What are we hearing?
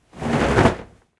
A stereo foley of a parachute opening. Could also be used as a sail luffing.